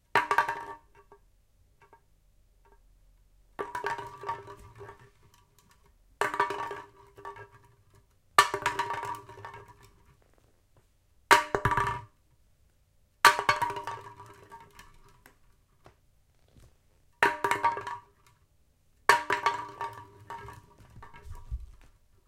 Rolling Can Sound 1
Rolling Can Sound. I Used AT2020 microphone.
can, rolling, tin